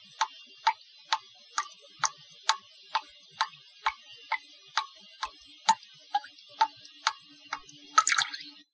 Fast Dripping
Medium-Fast water drips from the kitchen faucet to a bowl of soapy water.
drip, liquid, trickle, water